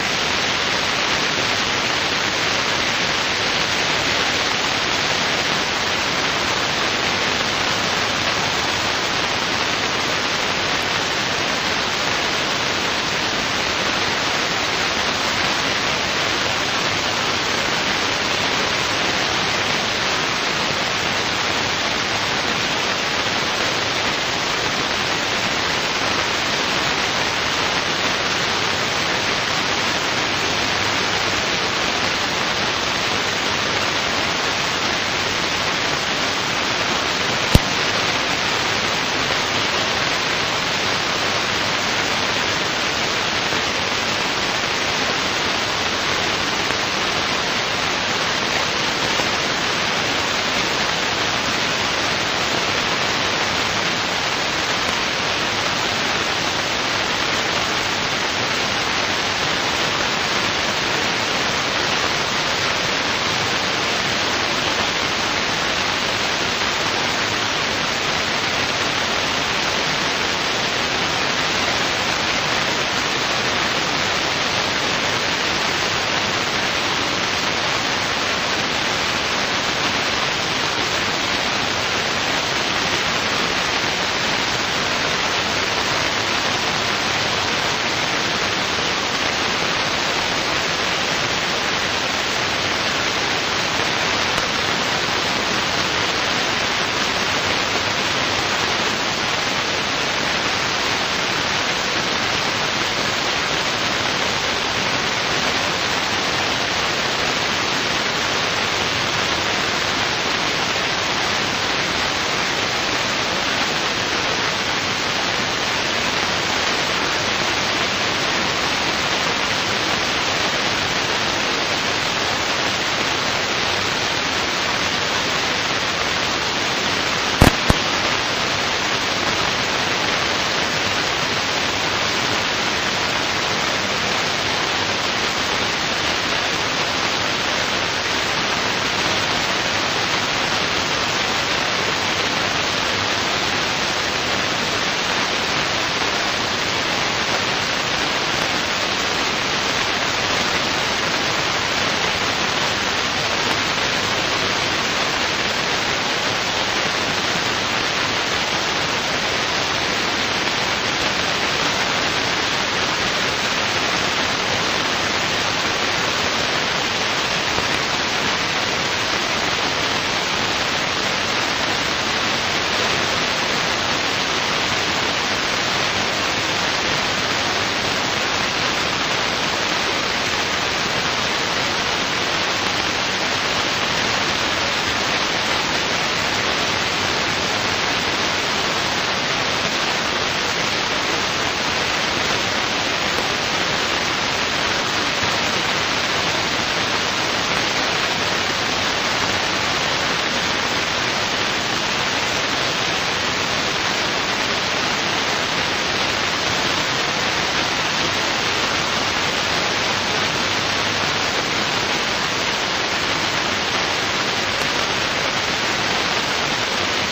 No transmission
A long loopable burst of white noise with some static spikes.